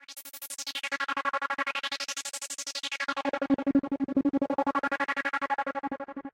26 ca dnb layers
These are 175 bpm synth layers background music could be brought forward in your mix and used as a synth lead could be used with drum and bass.
fx, drum, techno, layers, samples, sound, rave, edm, club, sample, bass, dub-step, drop, electro, multi, lead, tech, background, glitch-hop, loop, effect, dance, trance, electronic, synth, house, layer